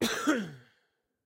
This is one of many coughs I produced while having a bout of flu.